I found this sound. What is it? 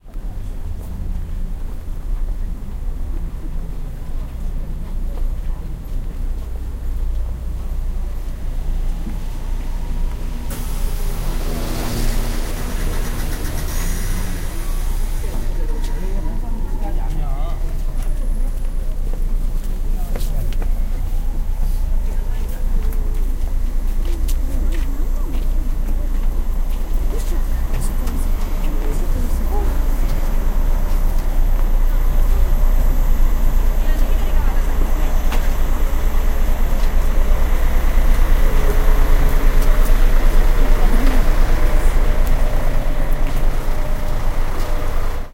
0098 Walking in the street
People walking in the street with some traffic. And a bus waiting very close
20120118